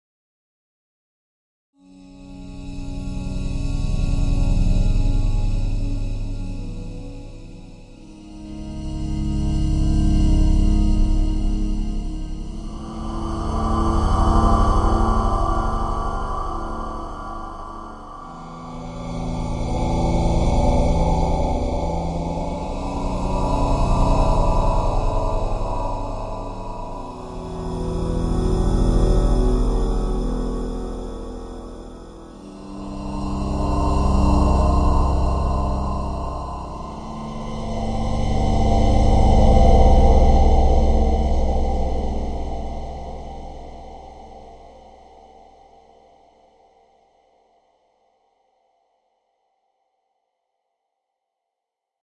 Sci Fi Landscape 3
Random chords, created with midi Akai keys on GarageBand.